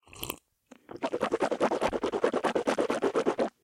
sip and mouth swish
Water sip with mouth swish. Recorded on RE-20 in treated room.
Thank you for using my sound for your project.
bar, drink, drinking, human, liquid, male, man, mouth, mouth-wash, sip, sipping, slurp, swish, swishing, water